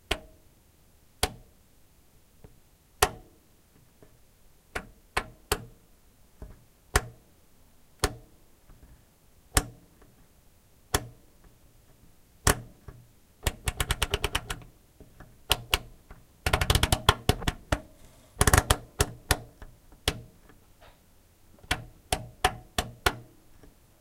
Clicky Knob Turning
Recorded with my Sony MZ-N707 MD and Sony ECM-MS907 Mic. While I was teaching in public school, I came across some old science class equipment that had very nice knobs and switches that flipped and clicked rather nicely. This is a recording of my turning some of those knobs.